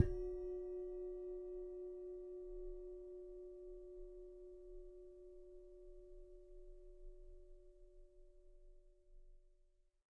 Mallet Bell 02
cymbal cymbals drums one-shot bowed percussion metal drum sample sabian splash ride china crash meinl paiste bell zildjian special hit sound groove beat
mallet, beat, bell, hit, cymbals, china, bowed, special, sound, ride, sabian, cymbal, metal, percussion, sample, splash, meinl, drum, one-shot, crash, groove, drums, zildjian, paiste